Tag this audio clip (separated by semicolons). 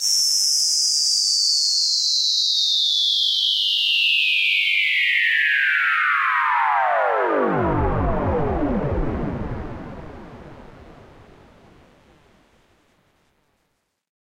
Buildup; BigBeat; Sweep; Breakdown; Effect; FX; Riser; Whistle; Faller